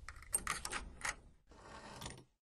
Fiddling with a lock - a sound effect for an online game I and my nine-year-old brother made: